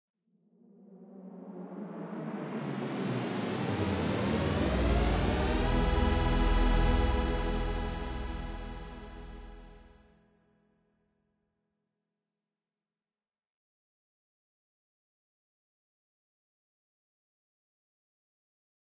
THX recreated
We all know the classic sound from the earlier movies from the 70s and 80s. The homogene sound that spreads out to be a massive chord. This is a recreation of this sound. I did it with 13 instances of my VST synth JBM Jagular, FL's reverb and some compression.
deep-note, recreation, thx, movie, logo